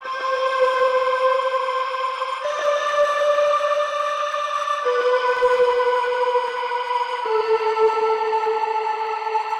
100 Lofi Defy Tonal Melody 09
Lofi Defy tonal melody 9
remix, Destruction, Defy, kit, 100BPM, Lofi